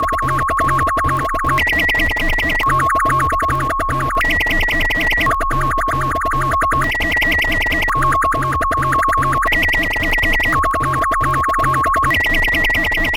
freq-mod
frequency modulation tone of a modular synthesizer
drone, modular-synth